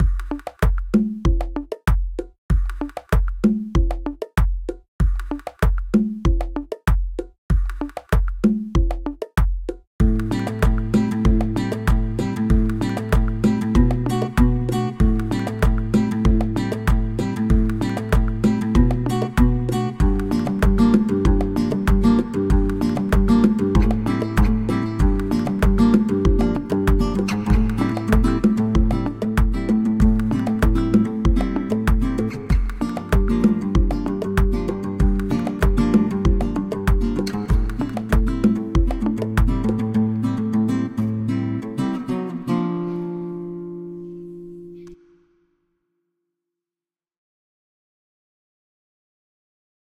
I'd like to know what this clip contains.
Warm guitar rhythm Intro
Metal strings guitar and percussion rhythm for intro
cadency, acoustic, rhythm, percussion, cadence, guitar, intro